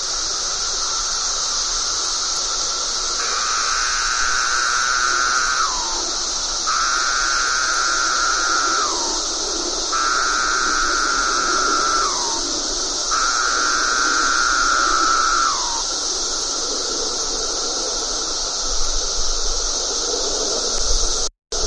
recorded single cicada with condenser mic
song, bug, cicada, insect